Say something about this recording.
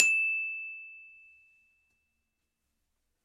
Samples of the small Glockenspiel I started out on as a child.
Have fun!
Recorded with a Zoom H5 and a Rode NT2000.
Edited in Audacity and ocenaudio.
It's always nice to hear what projects you use these sounds for.
campanelli, Glockenspiel, metal, metallophone, multi-sample, multisample, note, one-shot, percussion, recording, sample, sample-pack, single-note